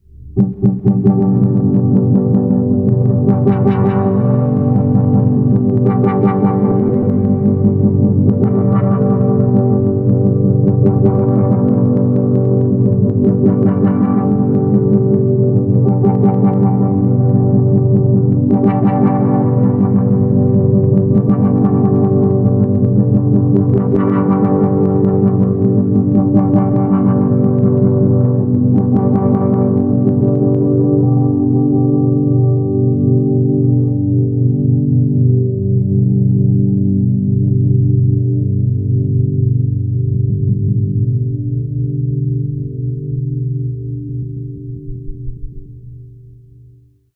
12string-Processed
One chord made with a 12 string guitar. processing: granular stretch, envelope LP filter, compression, reverb.
ambient, granular, guitar